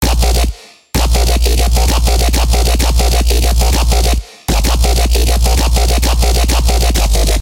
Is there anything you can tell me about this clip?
becop bass 6
Part of my becope track, small parts, unused parts, edited and unedited parts.
A bassline made in fl studio and serum.
A talking and whispy grinding 1/6 bassline with a punching 1/4 snap
low, techno, loop, bass, synth, fl-Studio, Djzin, dubstep, wobble, electronic, Xin, electro, loops, sub, grind